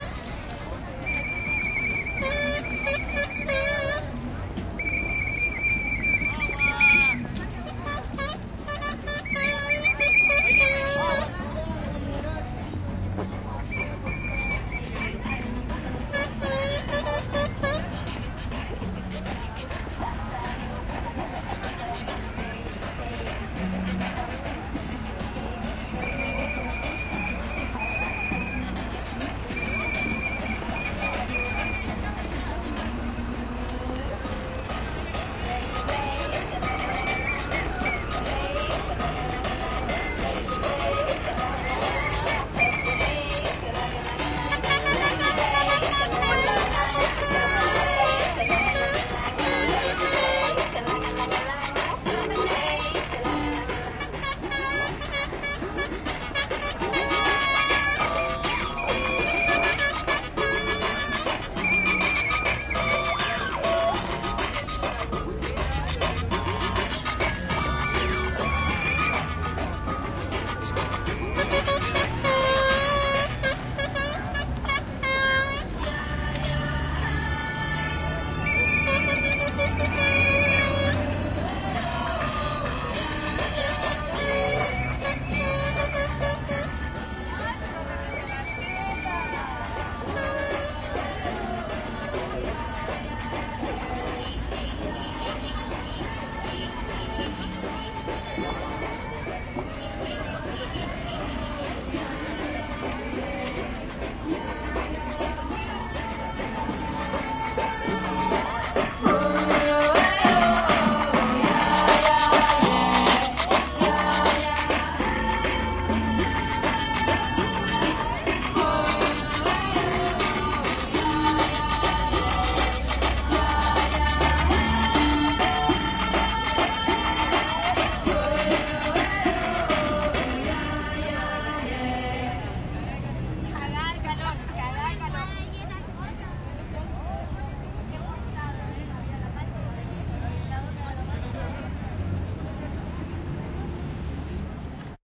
marcha de las putas y maracas 10 - en la estacion central
Cornetas y pitos mientras se vende agua. musica bailable se pasea entre la multitud y el zumbido de fondo.
crowd, protesta, marcha, maracas, santiago, music, street, corneta, chile, leonor, gritos, silvestri, pitos, musica, protest, calle, putas, horn